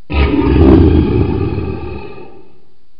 this was made by coughing and then slowing it down.
creepy, growl, horror, Monster, monster-roar, Roar